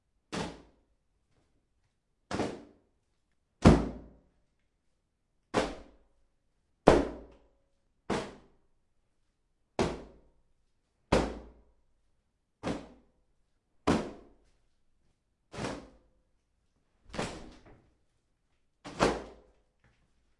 Wet Towel Floor Impact Punch Drop Bag Cloth
Wet towel dropped on tile floor.
punch, floor, attack, impact, put, hit, club, schlagen, cloth, towel, drop, spash, hitting, wet, bag, zusammenschalg, splat, water, schlag, zusammenprall